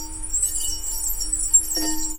cuerda atmosfera
bohemia glass glasses wine flute violin jangle tinkle clank cling clang clink chink ring
bohemia, chink, clang, clank, cling, clink, flute, glass, glasses, jangle, ring, tinkle, violin, wine